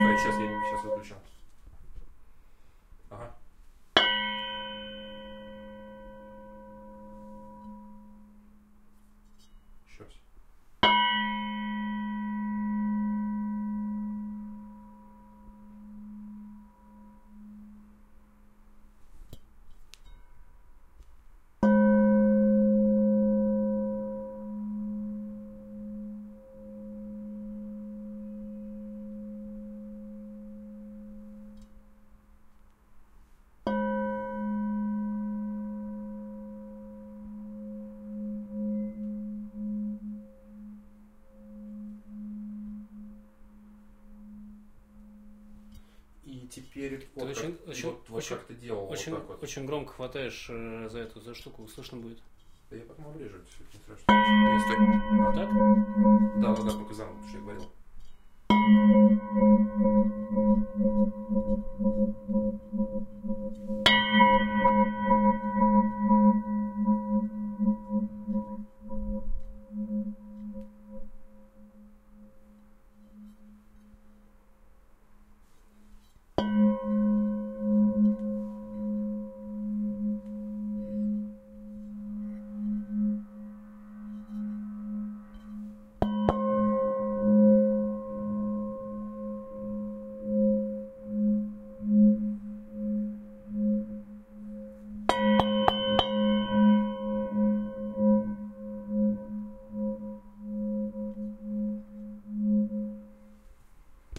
Hit metal pan cover bell vibration deep 2
Beating metal pan's cover with a wooden stick. Very similar with the bell sound. Long, bright and clear sound. Recorded on Zoom H6's shotgun mic.
Pan, bell, metal